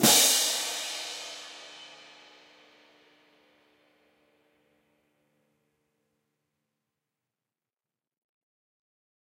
Marching Hand Cymbal Pair Volume 25
This sample is part of a multi-velocity pack recording of a pair of marching hand cymbals clashed together.